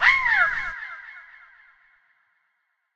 reinsamba Nightingale song happydub1-rwrk
reinsamba made. the birdsong was slowdown, sliced, edited, reverbered and processed with and a soft touch of tape delay.
bird, reggae, score, space, surprise